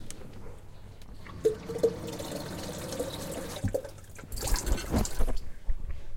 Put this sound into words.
nalévání vody
hot, water